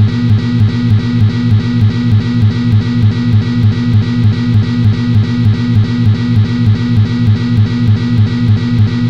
Evil sounding loop of the living dead... suitable for foggy graveyard scenes with shifting shapes in the shadows... etc.